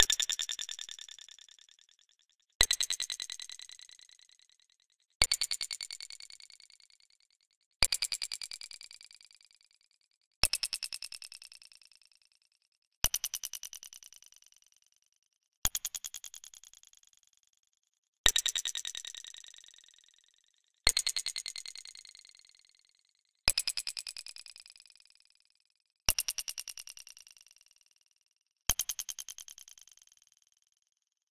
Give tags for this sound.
fx effect sound sfx

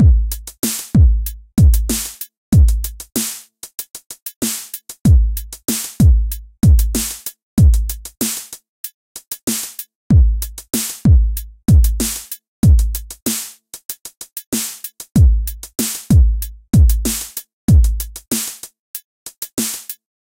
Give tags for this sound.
loop hop hip

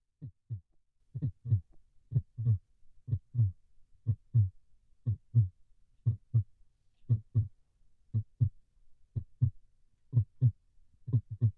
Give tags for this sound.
heart heartbeat beating

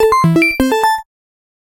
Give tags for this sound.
click bleep gamesound game adventure explosion application levelUp kick sfx startup clicks beep

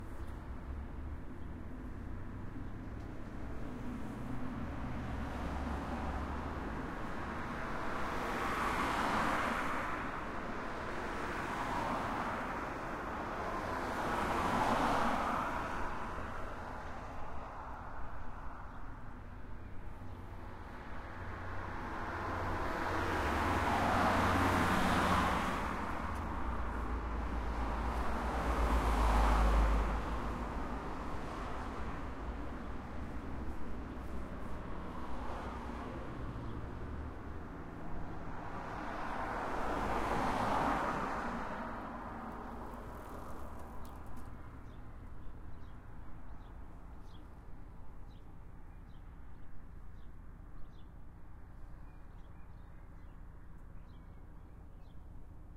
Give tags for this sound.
Car Free Highway Motorway Passing Road Transport

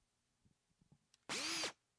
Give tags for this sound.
drill drilling

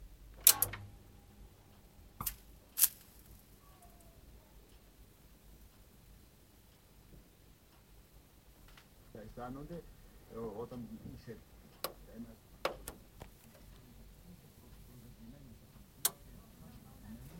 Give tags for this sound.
tv 100hz

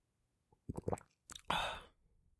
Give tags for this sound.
human swallow water

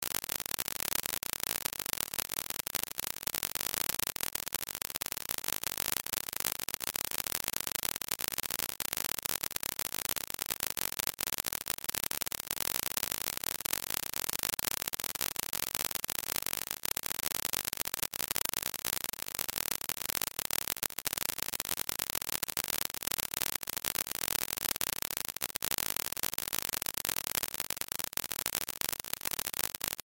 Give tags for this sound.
dust density noise digital

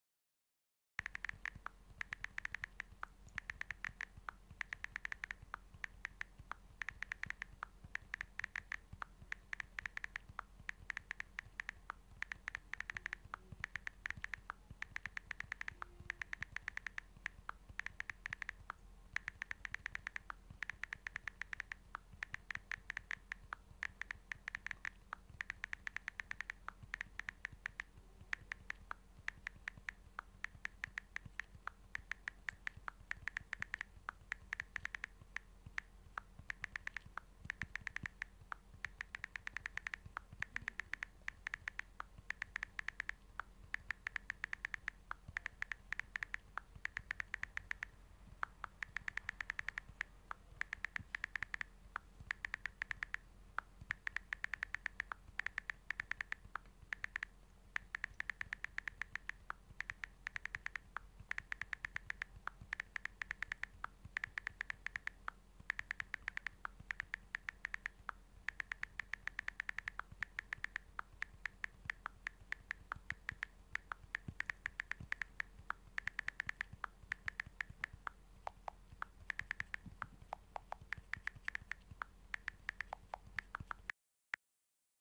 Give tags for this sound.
chill couch friends iphone keyboard mobile phone relaxing smart smartphone text texting type typing

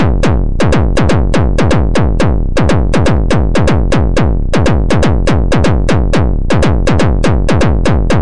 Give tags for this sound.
bass-drum bassdrum bd drum kick kickdrum percussion west-coast

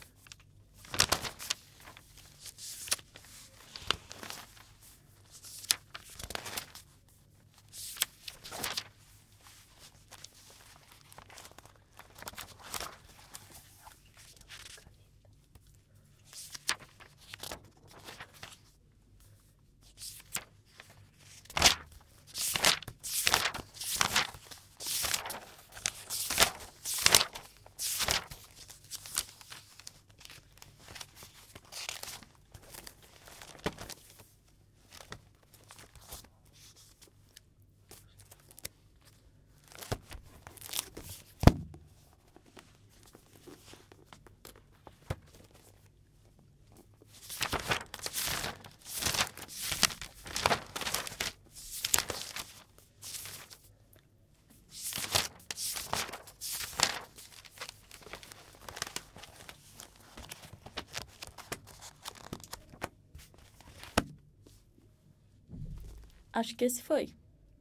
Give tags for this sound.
CaptacaoEdicaoAudio SoundscapeMusic UniversidadeAnhembiMorumbi AnhembiMorumbi ProTools AKG414 RTV AnaliseMusical SonsDeUniversidade JJGibson EscutaEcologica 3Semestre

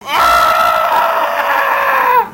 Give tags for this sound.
sad scream marks kid rapa india cry serbia nobi rape emotional gee love sepe fear lois yell

